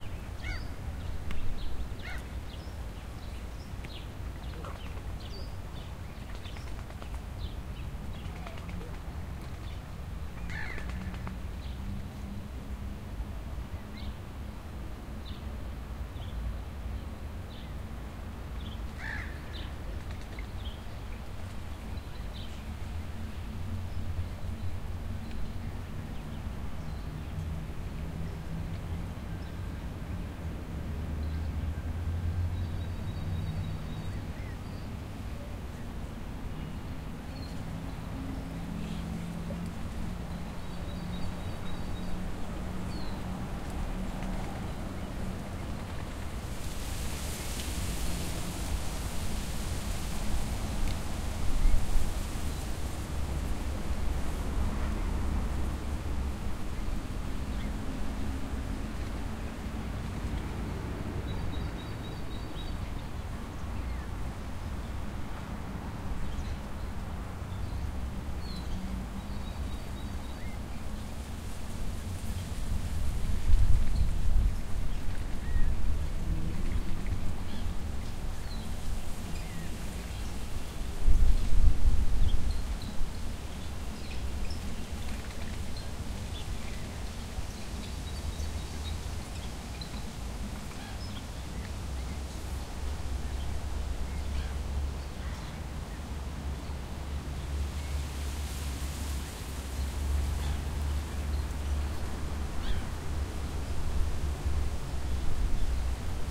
lake 1 3ch
Birds on small lake in the city. Flapping of wings. Cawing birds. Noise of leaves. Rumble of city in the background.
Recorded: 16-06-2013.
XY-stereo + central channel variant.
Tascam DR-40 internal mic + Pro Audio TM-60
It isn't 2.1 sound! It's stereo + central channel which recorded by super-directional microphone.
See also:
field-recording, ambient, Russia, background, noise, ambience, atmosphere, rumble, lake, background-sound, wings